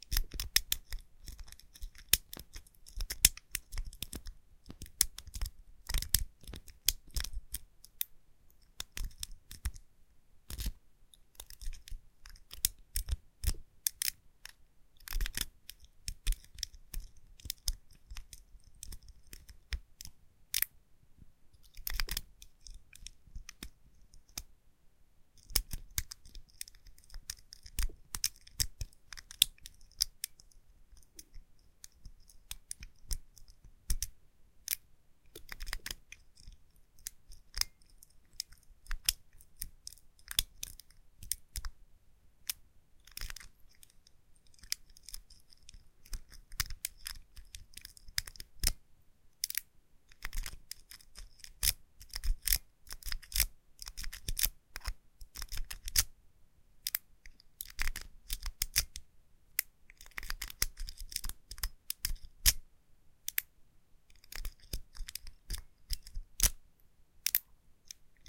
Pad lock being picked